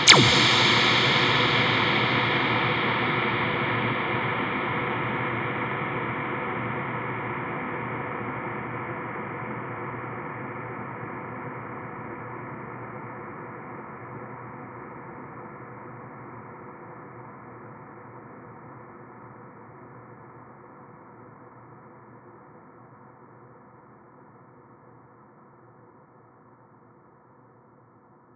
A laser hit with a massive reverb